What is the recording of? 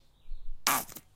squirting bottle
Squirting hand cream out of a plastic bottle.
squishing, squirting, plastic, squeeze, squirt, push, pump, OWI, bottle